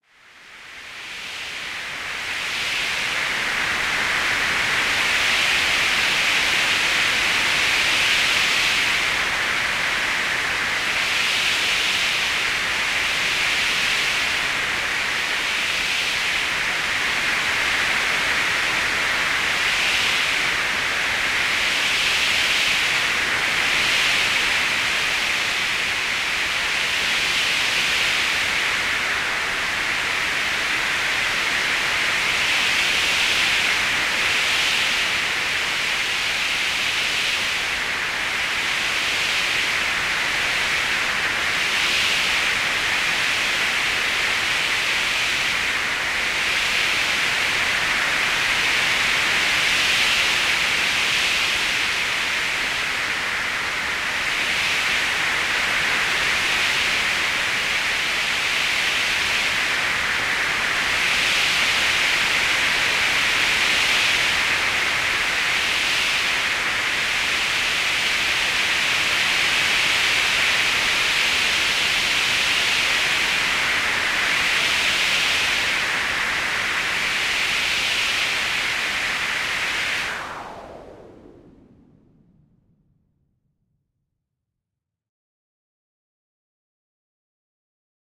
Arctic Winds Northpole 3 (Dramatic and more Realistic Snowbreeze)
This is number 3 of my previous (one year ago) uploaded Arctic Wind sounds, but this time i started back again from the scratch, with a duo of noise sound operators and with the power of 4 LFO's instead of 2 manipulating each other (panning, amp, cutoff envelope and Filter-Q/Cue). Some of the LFO's were randomized, one with a huge bandwidth, some with smaller setups. The filter setting was low pass 12 db instead of 24 db.
I hope you enjoy the more realistic and dramatic snowbreeze sound, that was completely computer/dsp (digital sound processor) processed!
Freeze, Cold, Wind, Snowbreeze, Winds, Realistic, Morphing, Pole, Arctic, North, Dramatic, Winter